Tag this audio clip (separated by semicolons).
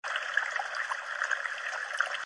FX; Loop; Water